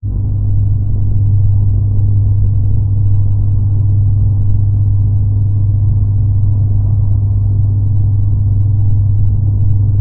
Interior Spaceship Ambience
This was originally a recording of my tumble dryer. Mixed in Pro Tools, I cut out a lot of the high frequencies and used reverb to blend the recording. The end result gave me an ambient track that could be used in a Sci-Fi video game or an interior spaceship scene. Enjoy!